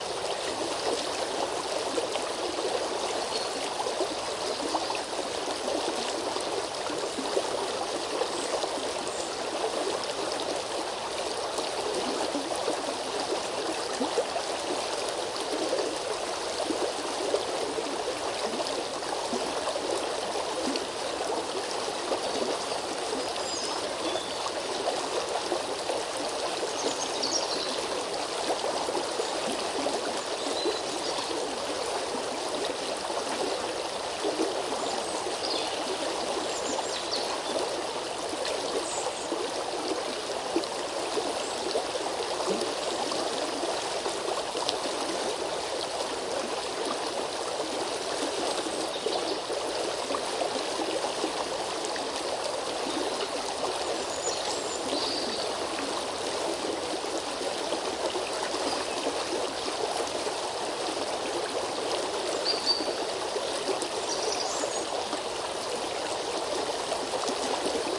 Streem, creek, birds, forest
birds, creek, field-recording, flow, flowing, forest, liquid, nature, river, streem, water